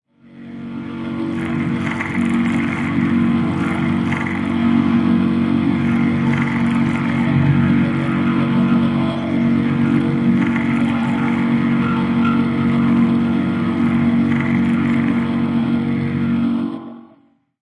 watery machine-01
water, machine